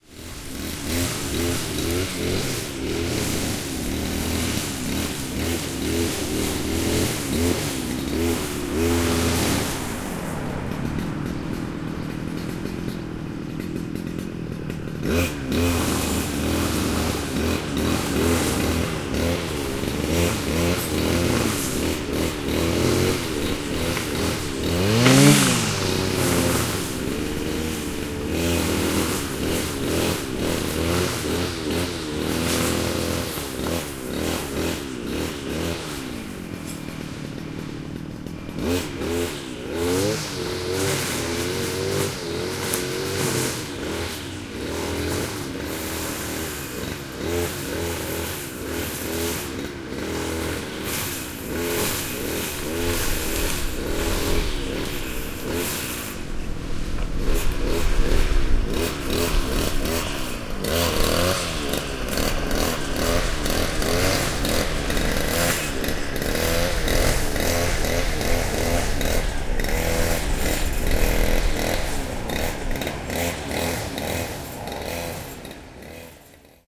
07.05.2011: about 11.30. in the city park between Filaretow, Pamiatkowa, Dabrowki and Langiewicza streets. in the front of the Zmartwychwstania church.noise produced by the mower.